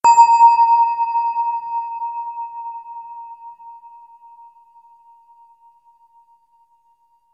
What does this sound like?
Synthetic Bell Sound. Note name and frequency in Hz are approx.